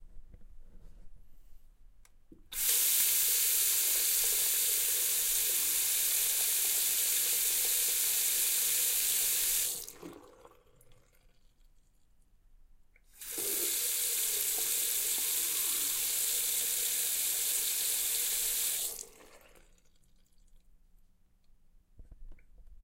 Faucet water running
Recorded with Zoom H6 X/Y
bath, bathroom, drain, faucet, running, sink, tap, tub, valve, water, water-cock